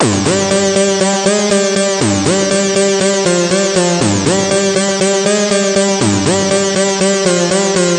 A brazen, strident sounding riff. A great anthem perhaps? 4/4. 120bpm. 4 bars in length.